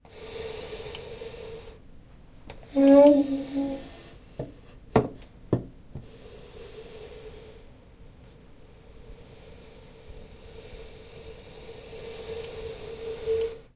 You can hear the sound of a chair moving. It has been recorded at Pompeu Fabra University.